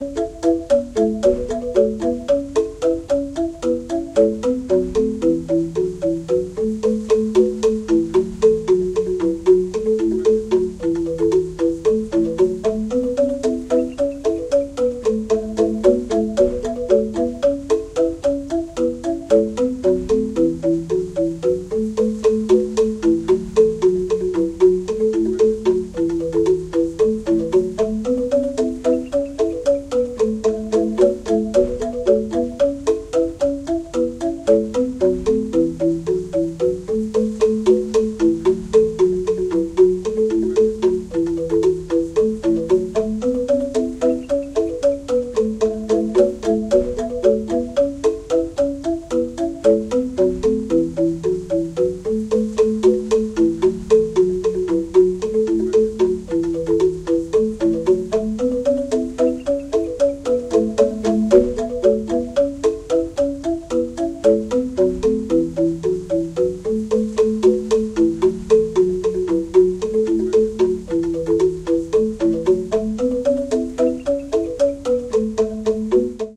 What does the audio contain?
bali xylophone
Two men playing xylophone. One 20 second sample is looped 5 times (or so), but it sounds good.
instrumental, music, traditional